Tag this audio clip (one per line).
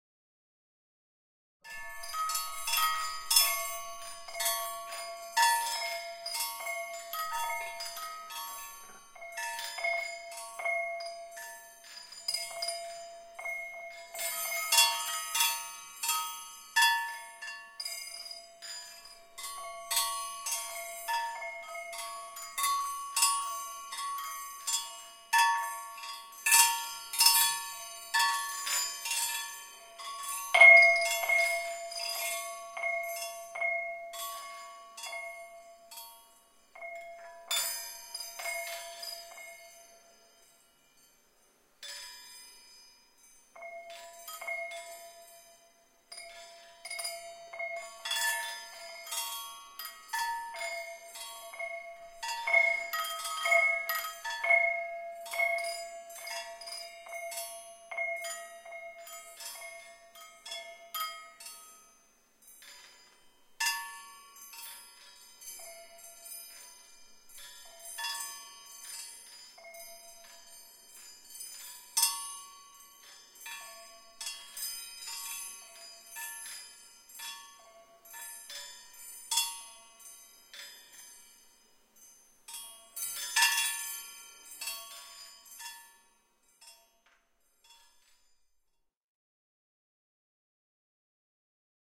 ting
chimes
windchimes
clank
clink